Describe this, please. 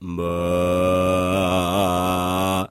Bad Singer (male) - Mal cantante (masculino)
I recorded some funny voices from friends for a job.
Grabé algunas voces graciosas con unos amigos para un trabajo.
GEAR: Cheap condenser mic/presonus tube.
EQUIPO: Micro de condensador barato/presonus tube.